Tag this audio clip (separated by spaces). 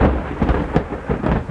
edited loops percussive thunder